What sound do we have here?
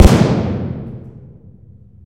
It's a little deep but I didn't want a wimpy backfire.
backfire, boom, car, combustion, engine, misfire, motor